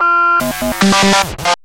Tyrell-Glitch1
Tyrell synth-Glitch effekt 1bar mono-loop
Rec -Ableton 9 64Bit
Audacity
145bpm, electronic, electro